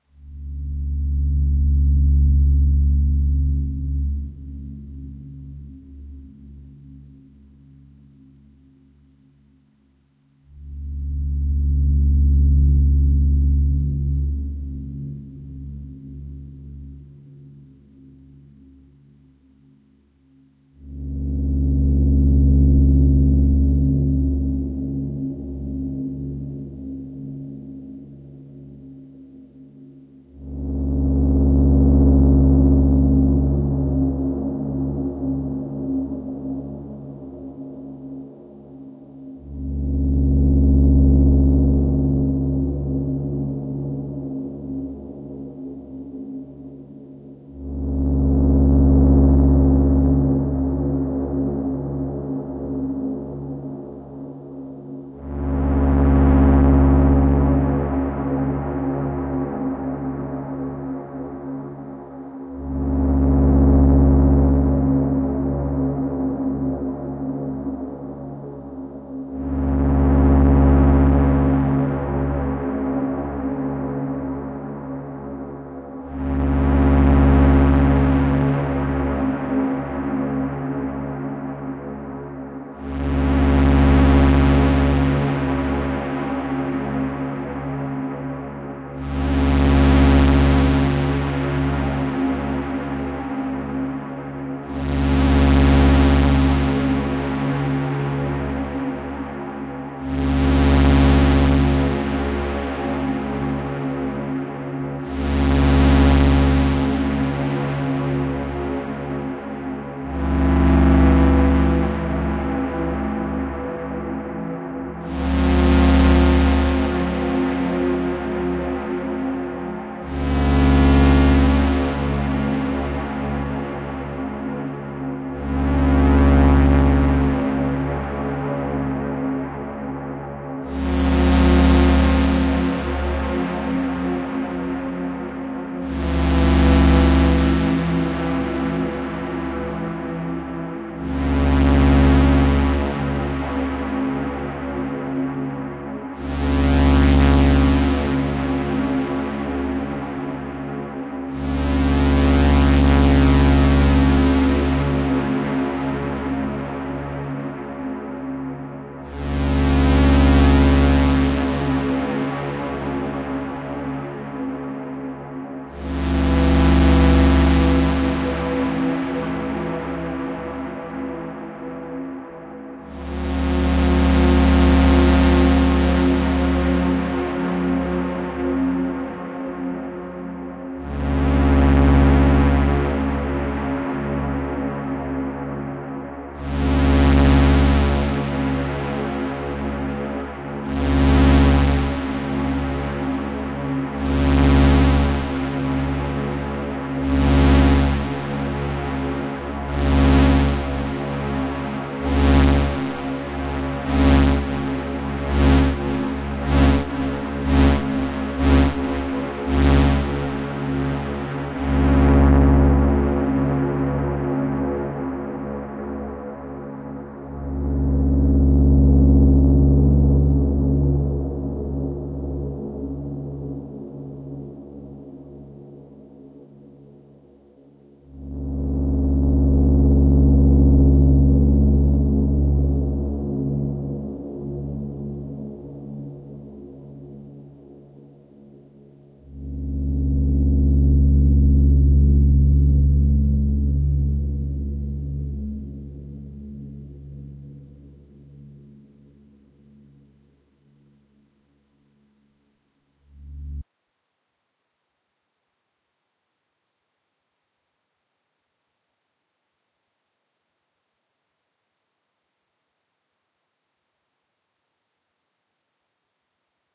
Pulsing Analog Drone
Pulsing drone sound i made on a my Behringer Model D analog synthesizer, recorded with some reverb and delay effects in Ableton Live. Some processing was done later in Adobe Audition to finalize this sound.
Ambient, Close, Drone, Metallic, analog, oscillator